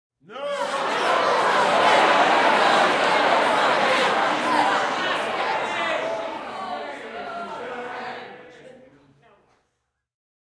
These are canned crowd sounds, recorded for a theatrical production. These were recorded in quad, with the design to be played out of four speakers, one near each corner of the room. We made them with a small group of people, and recorded 20 layers or so of each reaction, moving the group around the room. There are some alternative arrangements of the layers, scooted around in time, to make some variation, which would help realism, if the sounds needed to be played back to back, like 3 rounds of applause in a row. These are the “staggered” files.
These were recorded in a medium size hall, with AKG C414’s for the front left and right channels, and Neumann KM184’s for the rear left and right channels.